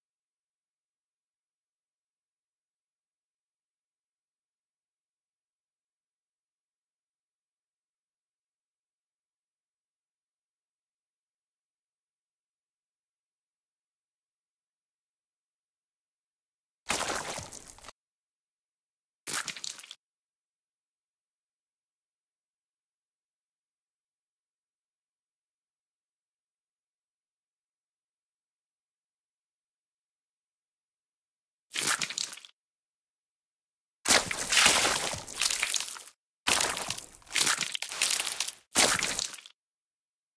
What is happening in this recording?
Blood'n'gore performance from the movie "Dead Season."